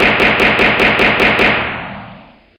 Machine Gun Shots
I used a single gunshot sound to make a classic gangster-movie machine gun sound. Can be easily looped.
Gunshots, Machine, Gun, Loud, Automatic